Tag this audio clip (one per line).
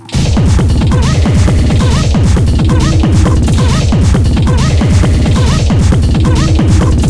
loop
loud
industrial
hard
techno